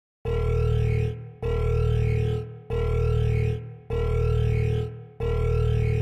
Danger Alarm
alarm, danger